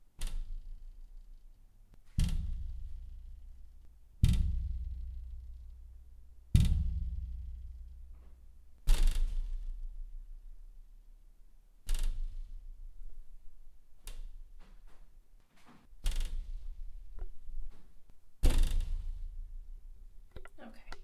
Glass Bounce
The reverberation of a pane of flexible glass being hit lightly. Originally used as a magic barrier. Recorded on a Zoom H4N
barrier, glass, bounce, wobble, impact